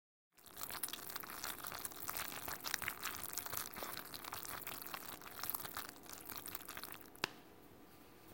Stirring Mac and Cheese
Stirring Mac & Cheese.
food, noodles, mac, cheese, pasta, stovetop, kitchen, cooking, pot, spoon